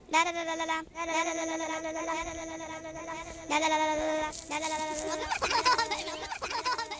9 years old kids have had enough with you, their teacher. They staged a coup and surrounded you in the shcoolyard to end your tyranny. Mockery has always been an efficient weapon against dictators and so they are sticking their tongues out laughing to strip you of the last bit of your authority.
For this metaphoric execution, I used an extract of a classmate testing the recorder and our own laughs after the attempt. I sped up the pace of the track to give a childish tone to our voices and used an echo effect to amplify the impression that there are several sources for the sound.
Code typologie de Schaeffer : V''
Masse : son tonique
Timbre harmonique : son brillant, assez éclatant
Grain : Lisse
Allure : Vibrato
Dynamique : Attaque plutôt douce
Profil mélodique : variation serpentine
Profil de masse : Site : on entend différentes hauteurs.